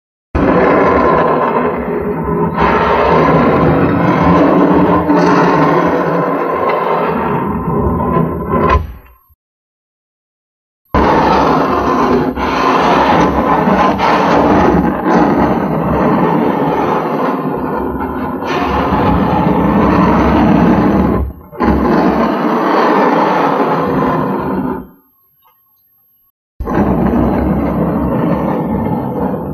Table Scratch
Scratching a wooden table
152, Scratching, MUS, Hand, SAC, Wood